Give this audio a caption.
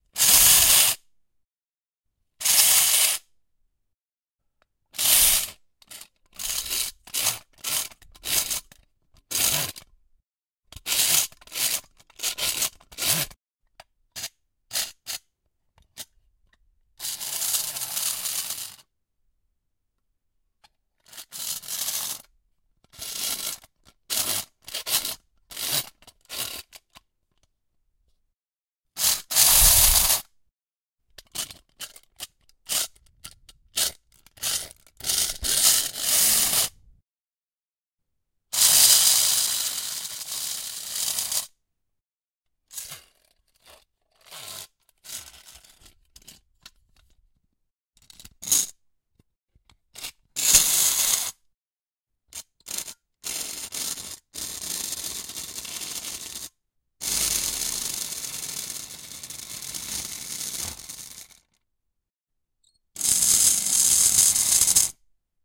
Tin Toys: helicopter normal functioning
This is the recording of little helicopter wind-up tin toy.
This is the sound the little helicopter tin toy emits in normal functioning.
Metal and spring noises.
metallic, spring, toy, metal, tin